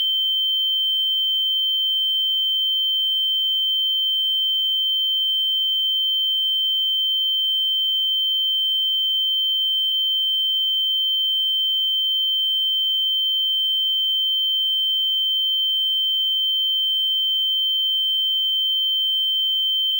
tinnitus ringing ears hearing impaired impairment ear white noise sound
ear, ringing, impairment, tinnitus, impaired, ears, noise, sound, hearing, white